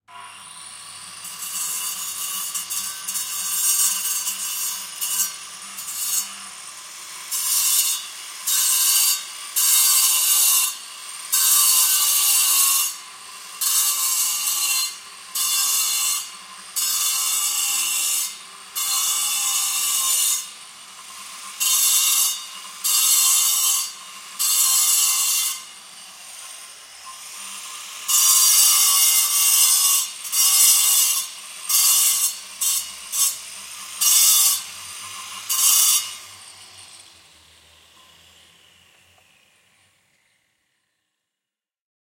Metal disc grinder cutting concrete recorded outdoors, from a 3 meters (9ft) distance.
Zoom H6
XY stock microphones